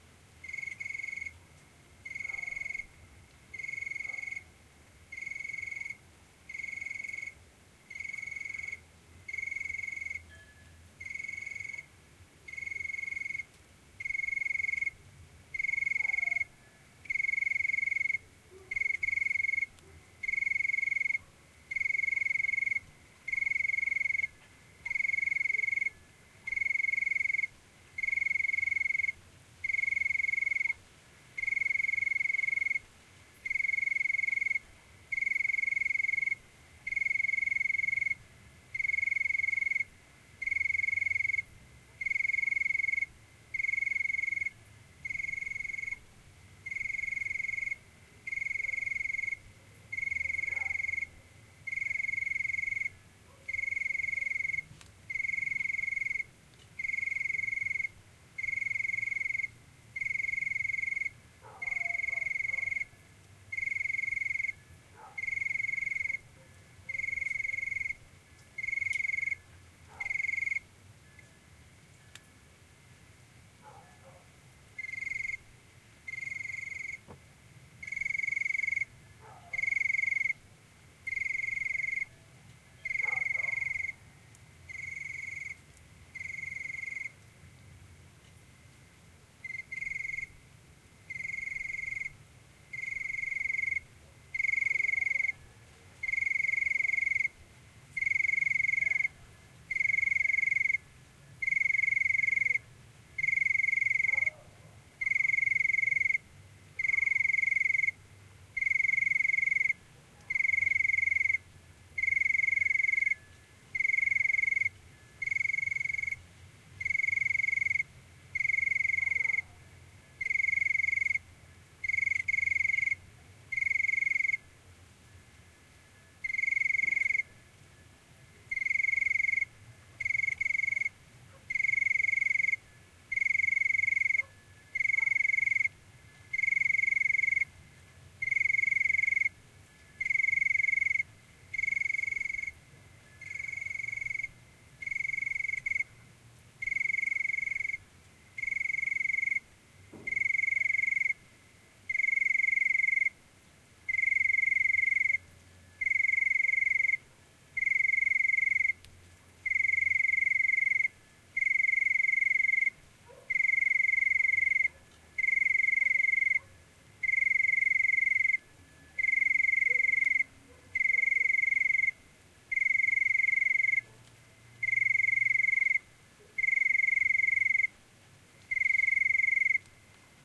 20161030 cold.cricket.71
During a (relatively) cold night, a lonely cricket chirps softly. Dog barkings in background. Audiotechnica BP4025 > Shure FP24 preamp > Tascam DR-60D MkII recorder. Aceña de la Borrega (Extremadura, Spain)